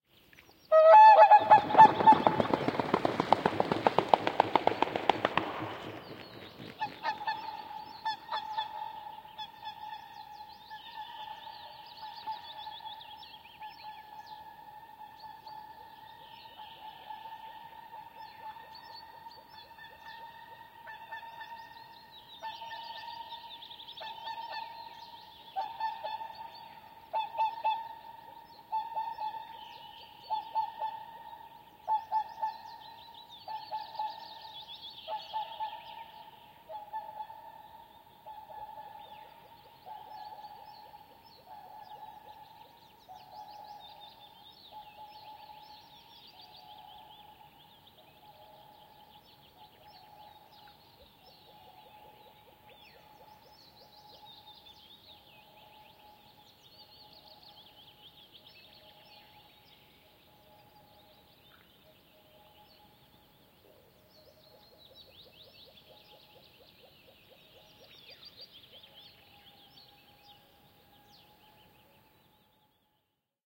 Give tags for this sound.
Bird
Birds
Call
Field-Recording
Finland
Finnish-Broadcasting-Company
Lento
Linnut
Lintu
Luonto
Nature
Siivet
Soundfx
Spring
Suomi
Swan
Tehosteet
Vesi
Water
Whooper
Wings
Yle
Yleisradio

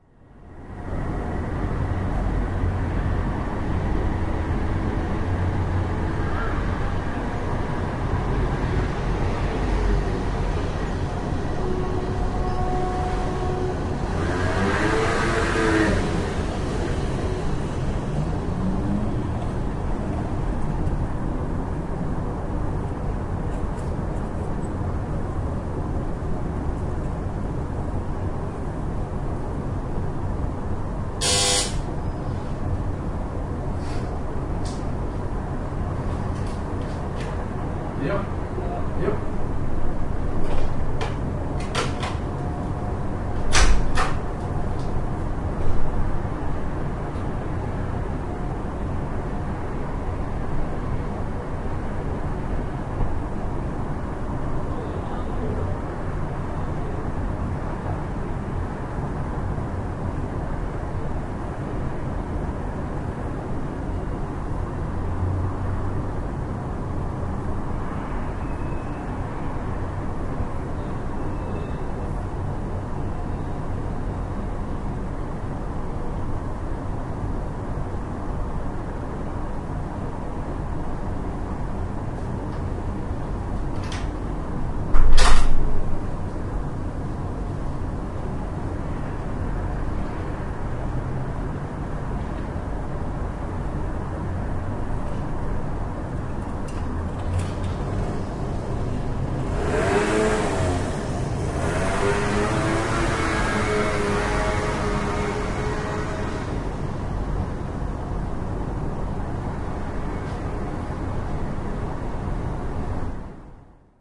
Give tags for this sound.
street
field-recording
traffic
human
engine
urban
household
street-noise
noise

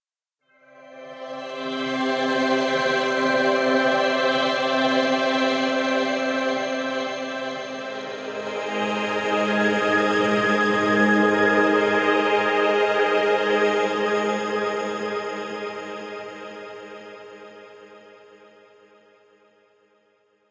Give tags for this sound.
ambience
future
energy
machine
drone
spaceship
dark
noise
deep
ambient
soundscape
starship
hover
sound-design
pad
space
fx
impulsion
Room
bridge
emergency
engine
electronic
background
rumble
atmosphere
futuristic
drive
effect
sci-fi

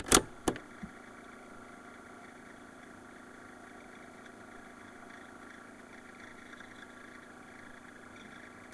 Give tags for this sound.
analog
play
magnetophone
switch
tape

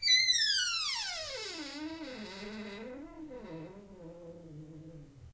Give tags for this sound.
wood
close
squeaky
wooden
creaking
creak
squeak
creaky
door
hinge

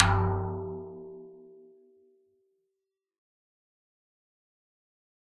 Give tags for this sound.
1-shot
drum
tom
multisample
velocity

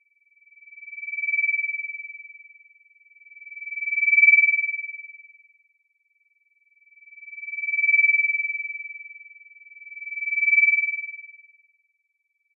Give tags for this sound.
effect
synthesizer
soundesign
scifi
synth
tense
cinematic
scary
feedback
contemporary
sweep
experiment
sci-fi
scoring
tension
fx
theatre
suspence
sound-effect

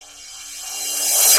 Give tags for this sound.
radio-imaging
sound-efx
sweepers

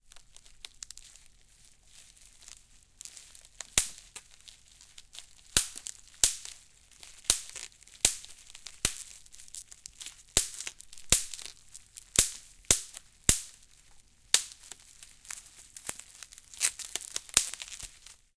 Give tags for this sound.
pops
garage
field-recording
audiodrama
bubblewrap
packaging
foley
squish
AudioDramaHub
moving

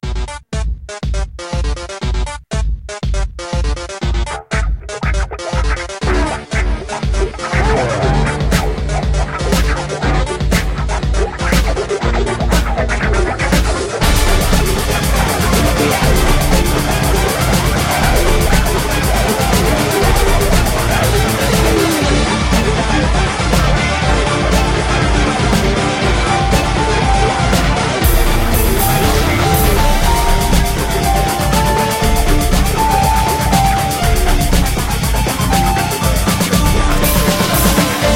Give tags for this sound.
Games
lo-fi
Mega
sans
8
electronica
bit
loop
Music

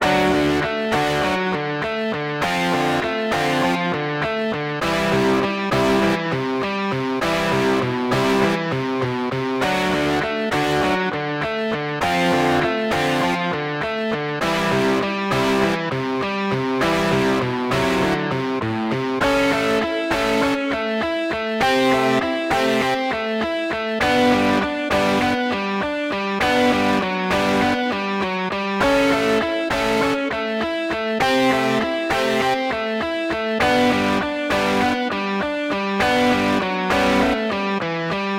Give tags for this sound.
distortion
power-chord
distorted
guitar
electric-guitar
loop
100bpm
overdrive
100-bpm

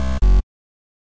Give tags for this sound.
blip
effect
game
sfx
sound
sound-effect
videogame

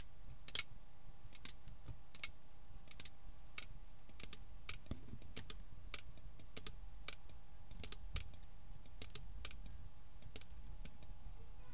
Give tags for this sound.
aluminium-film
noise
metalic
aluminium

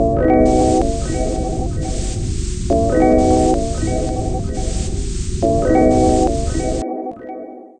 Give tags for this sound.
mechanism
Piano
pumping

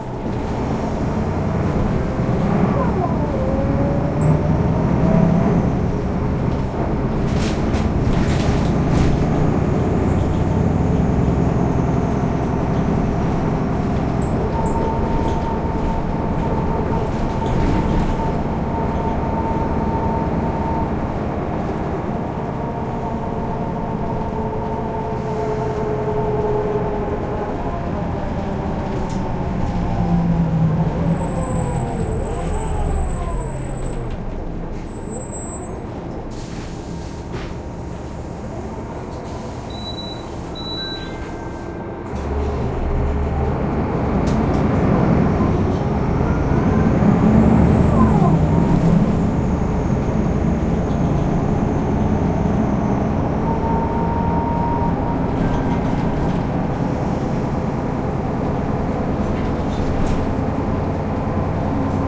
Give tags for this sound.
autobus
bus
transport

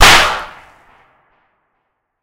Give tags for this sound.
explosion
loud
pop
gun
weapon
gunshot
bang

